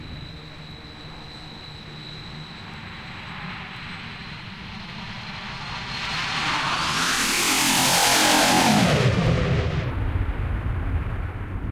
This is one of my favorite sounds to open my live sets with and is featured in my track Water's Bomb, as well as in the intro to the Digital Juggernauts album, "Giant Killer Robots." To make it, you use a sample of a plane flying over, I used a 707, and a DJM-500's pitch shifter fx. As the sample gets longer, turn the pitch to the high end, then to the low end. Hope you all love and use this sound!
Killer, Robots, DJM-500, Giant, Waters